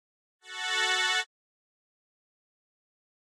Creepy Bagpipes - fade in
Some creepy bagpipes fade in. It's pretty short.
I made it in a program called BeepBox, a free online tracker tool.
It could be used for building suspense.
Want to use this sound? Go ahead!
Please tell me if you use this, I'm interested in how they've helped!
short, bagpipes, scary, fade-in, creepy